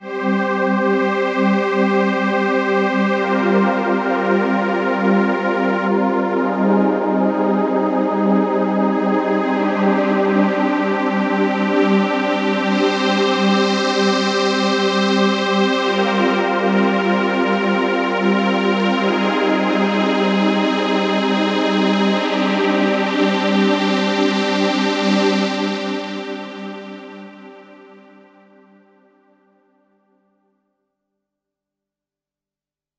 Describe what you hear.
alone in the galaxy
loop, sweep, synth, pad